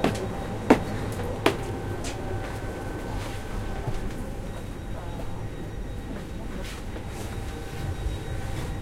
2022 trainstation atmopheric+steps staircase 001
walking the steps in an train
field-recording, foot, foot-steps, rail, stair, staircase, steps, train